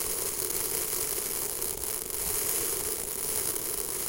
Cascade semoule Bcl
cereals falling in a plate